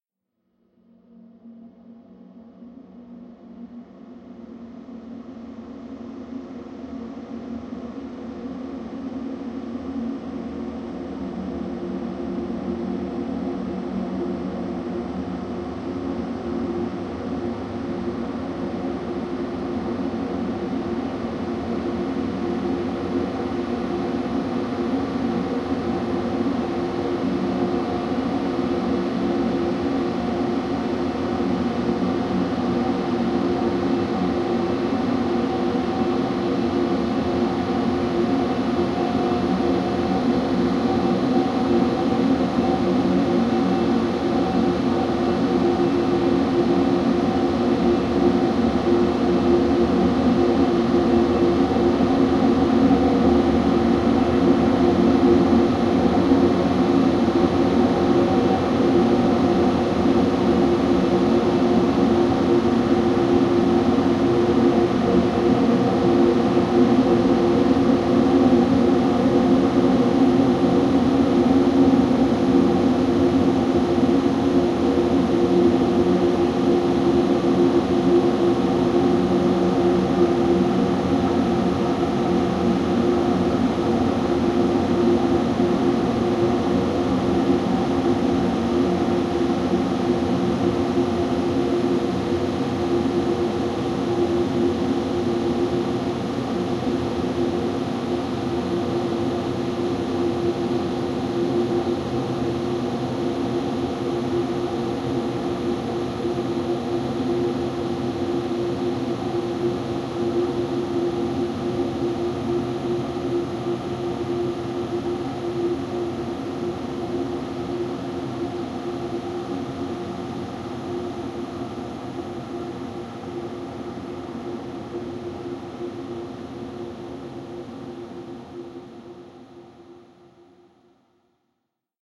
Melodrone multisample 06 - The Sound of Dreaming iInsects - E3
This sample is part of the “Melodrone multisample 06 - The Sound of Dreaming iInsects” sample pack. A massive choir of insects having a lucid dream on Uranus. The pack consists of 7 samples which form a multisample to load into your favorite sampler. The key of the sample is in the name of the sample. These Melodrone multisamples are long samples that can be used without using any looping. They are in fact playable melodic drones. They were created using several audio processing techniques on diverse synth sounds: pitch shifting & bending, delays, reverbs and especially convolution.
ambient, atmosphere, drone, multisample